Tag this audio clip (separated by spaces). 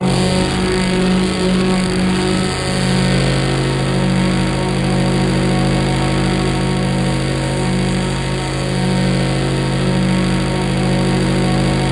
Multisample Texture Synth Ringmod